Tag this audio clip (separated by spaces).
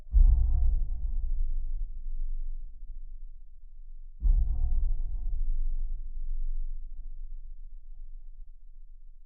Low Metal Metallic